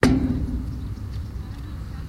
Metal coated tree with mallet and stick samples, recorded from physical portable recorder
The meadow, San Francisco 2020
metal metallic resonant percussive hit percussion drum tree field-recording industrial impact high-quality city